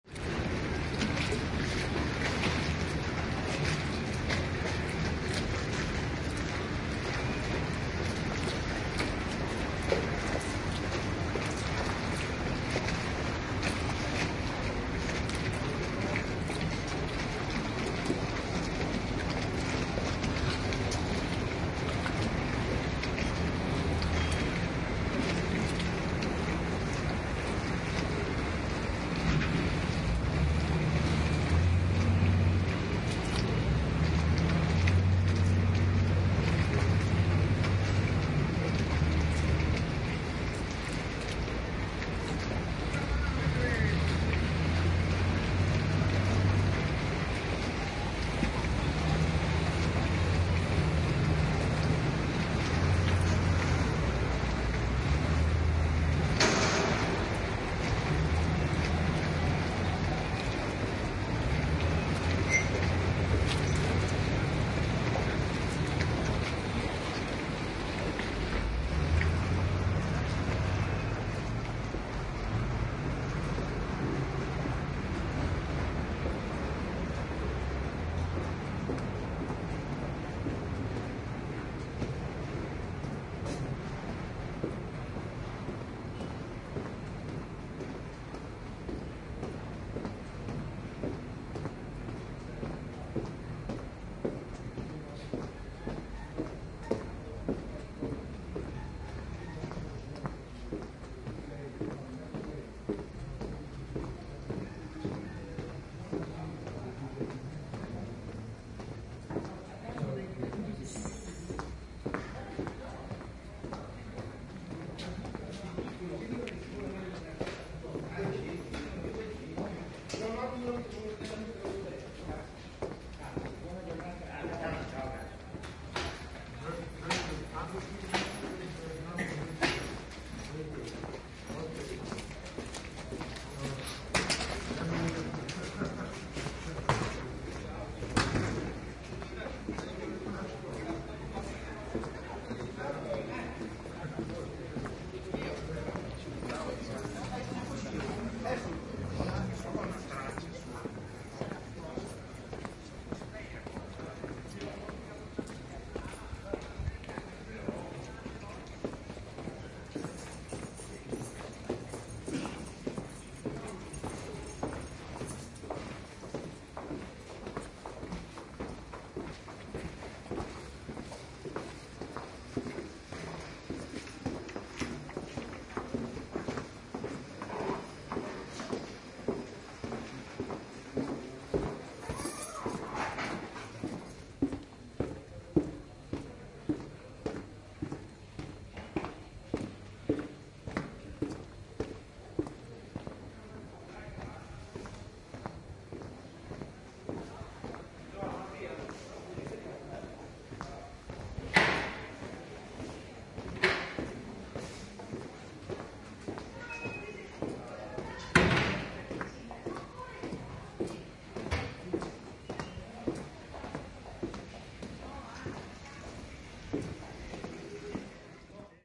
20080303 Venice walkthrough
During the walk one first hears the water, of the canal in Venice, next me, walking through the streets of Venice, other people passing by, a person with keys, opening a door etc.
walkthrough, city, field, water, binaural, footsteps, venice, recording